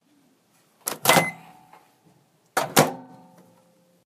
The sound of a microwave door.
appliance, kitchen, microwave-door